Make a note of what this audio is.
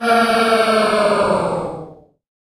Sci-Fi Generator Shutdown
Paulstretched the voice of a friend (recorded with a Zoom H2, permission obtained) in Audacity and added freeverb and sliding speed/pitch shift.